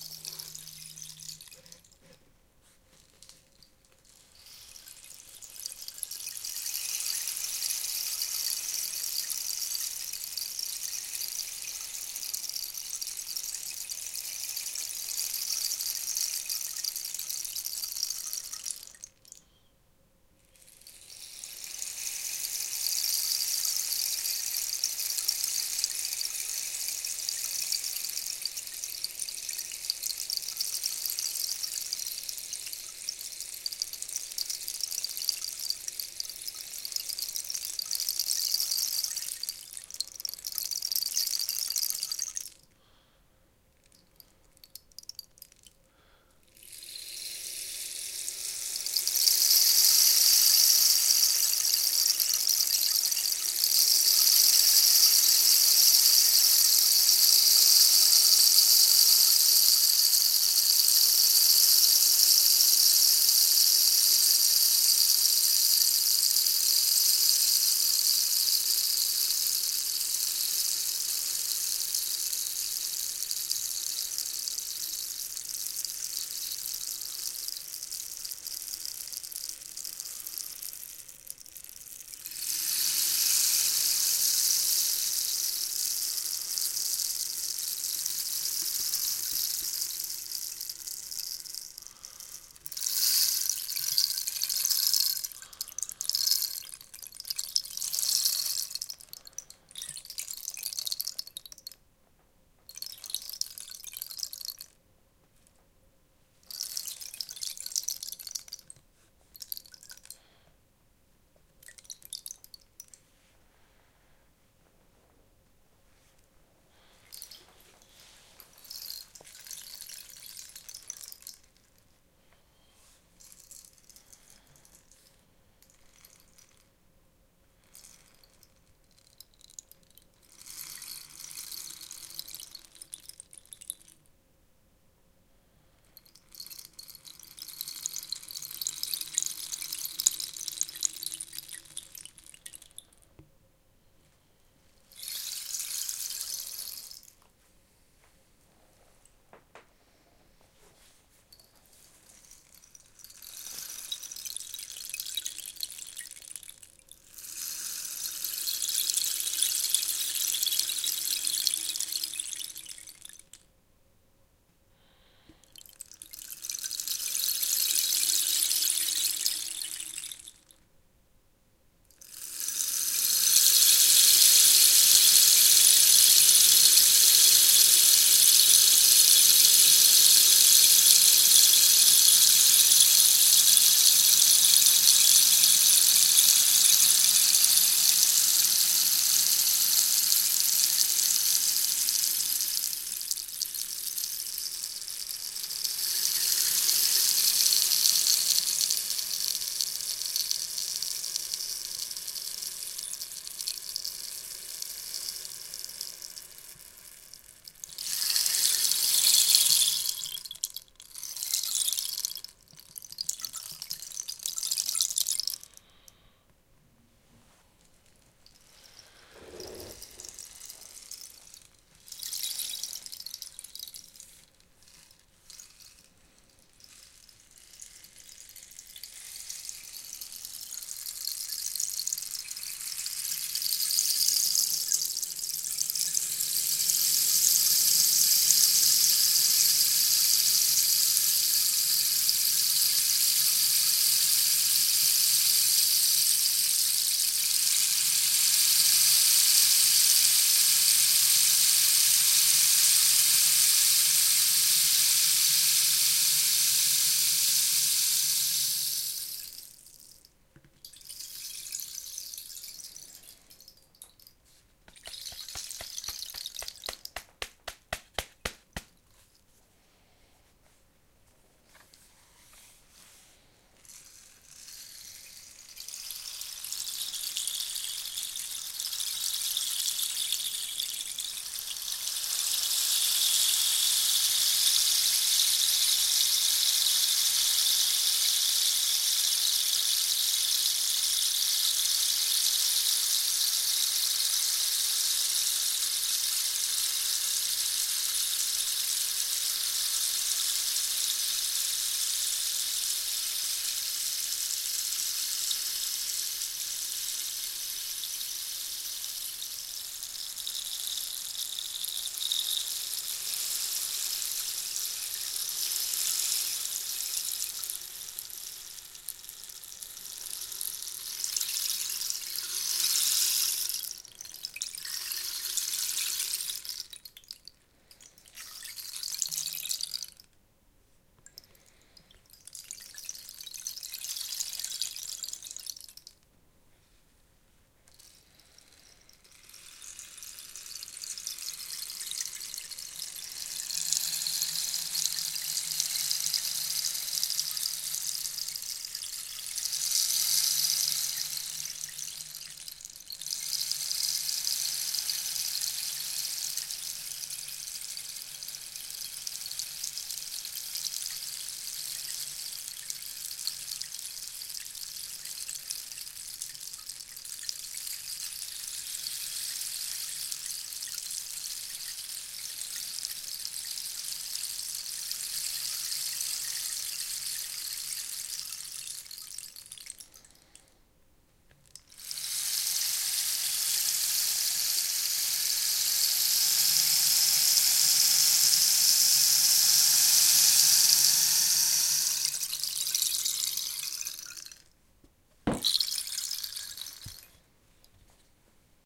Rain stick 1
Rain stick sound
rain, rainstick, shaker, stick